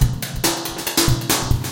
Spring Beats
based off of analog beats 1, but added fx to make it sound "springy"
analog, beat, dirty, drum, echo, hat, hi, kick, loop, noise, old, processed, reverb, school, snare, spring, white